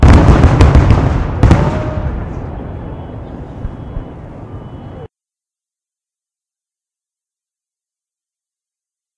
multi low hits 02
recording of a multi firework explosions
outside, fireworks, distant, ambience, hit, loud, explosion, fire, low